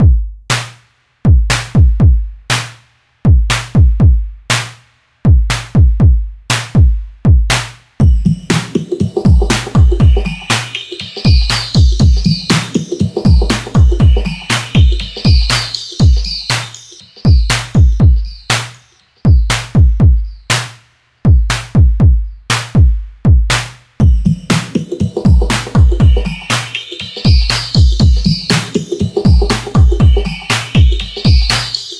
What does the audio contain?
mover 120bpm
Simple Drumbeat with percussion delayed and filtered
Filtering, Simple, Delay, Percussion